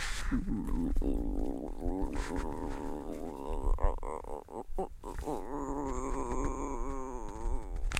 Hiroshima TM TE02 Top of Mt Tenjo
Hiroshima, Mt-Tenjo